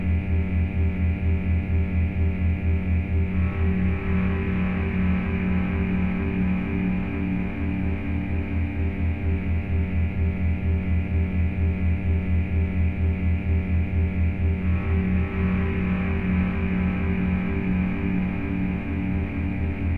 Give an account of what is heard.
Scifi Industrial Atmosphere 1
A scifi industrial atmosphere sfx sound creating a dark ambiance for your game. Perfect for industrial, mine, space ship, space station, engines, etc.
Looping seamless.